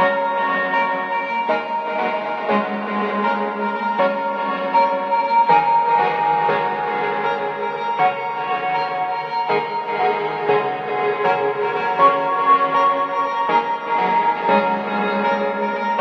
a happy sounding synthesizer loop